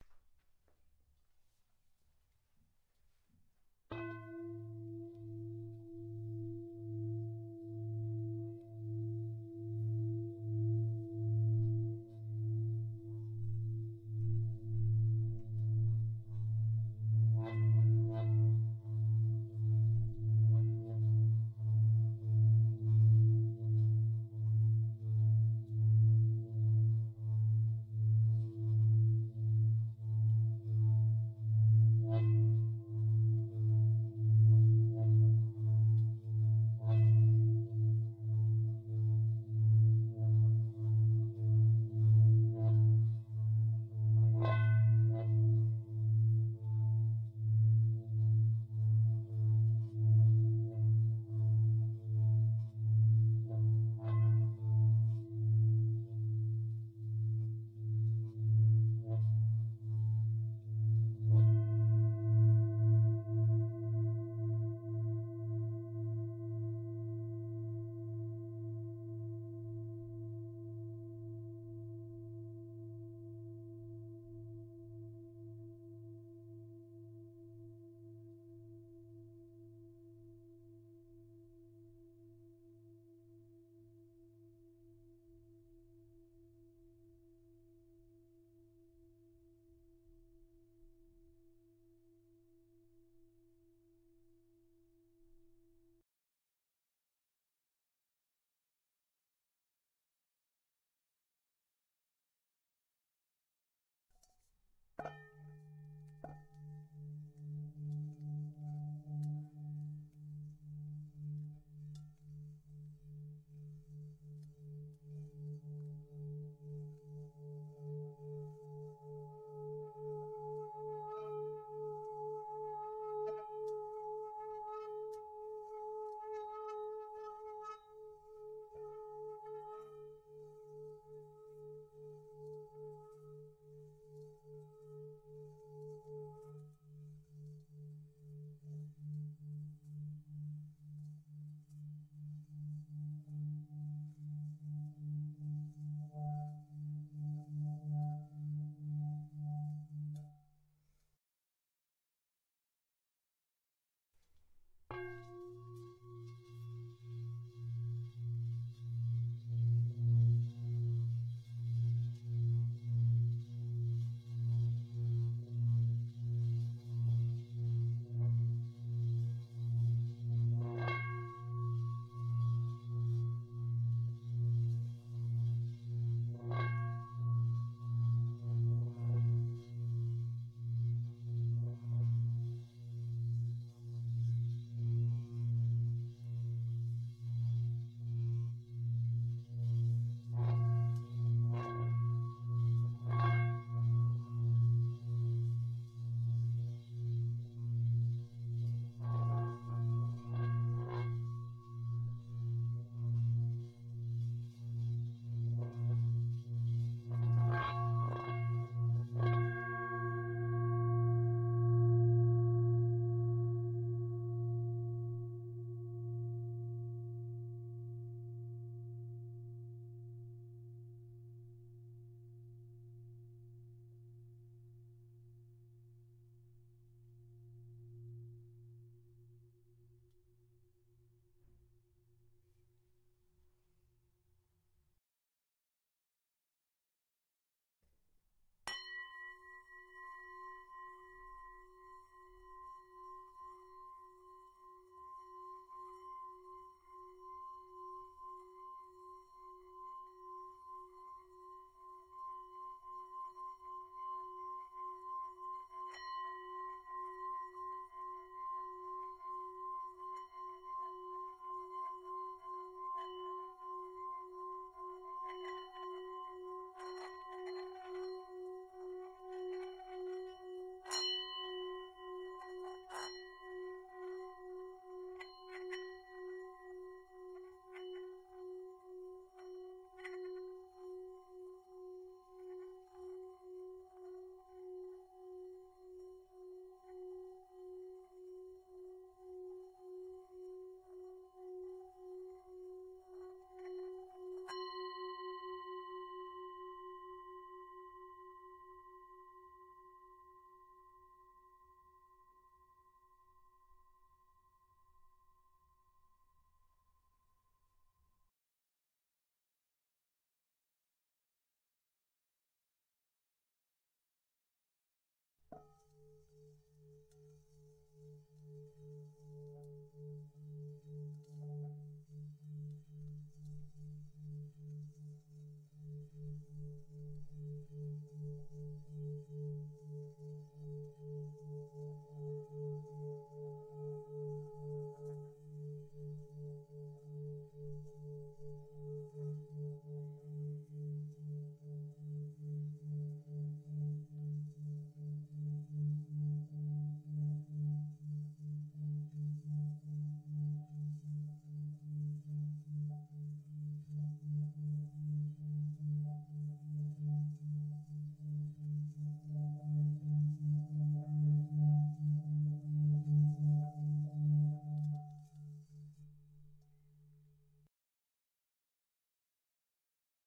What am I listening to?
bowl rim plays mixed1
Mixed Tibetan bowls, of different pitches, sample lengths vary
tibetan-instruments, sounds, ambient, healing, Tibetan, bowls